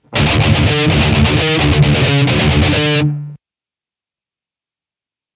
DIST GUIT 130BPM 6
Metal Guitar Loops All but number 4 need to be trimmed in this pack. they are all 130 BPM 440 A with the low E dropped to D